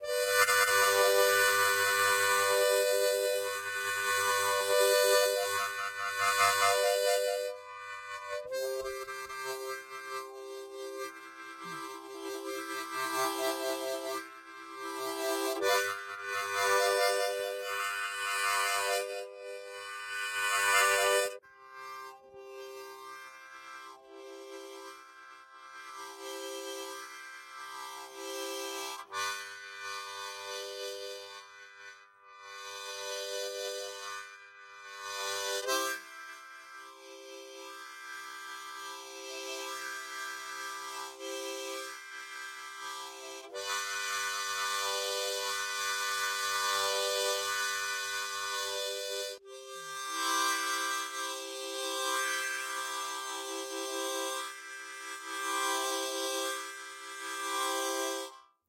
Harmonica Chord Variations 03
Key, Harmonica, Chords